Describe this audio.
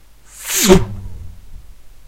Energy impact 5
An energy effect inspired by anime Fate/Zero or Fate/Stay Night series.
magic, explosion, energy